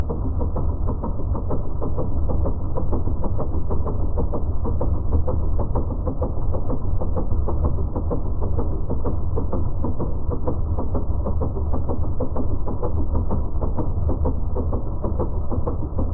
I created this sound to emulate what a dance beat might sound like in a canyon. Was originally a hot dog warmer with a nice rattle to it. Recorded on Zoom H2.